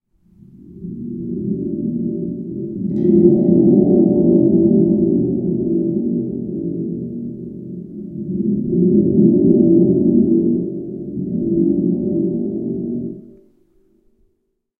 Spring Drum (3)
slow shake of spring drum
drumhead, spring